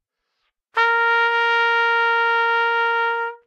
Trumpet - Asharp4
Part of the Good-sounds dataset of monophonic instrumental sounds.
instrument::trumpet
note::Asharp
octave::4
midi note::58
good-sounds-id::2839
Asharp4, good-sounds, multisample, neumann-U87, single-note, trumpet